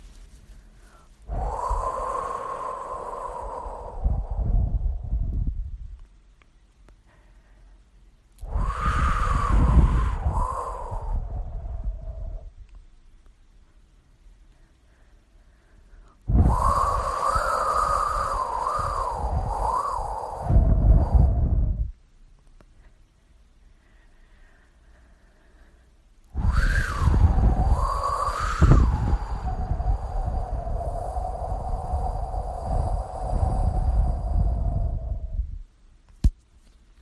Wind long
wind breeze swoosh air gust
air, breeze, gust, swoosh, wind